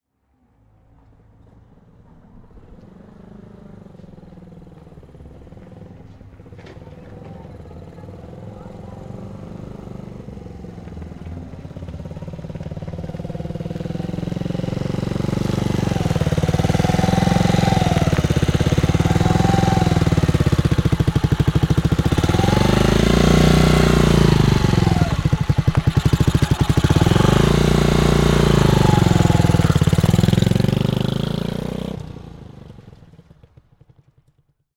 Jerry Honda 125 slow approch follow & ride off
The sound of a Honda 125cc motorcycle approaching, slowly following closely and then riding off
bike, engine, rev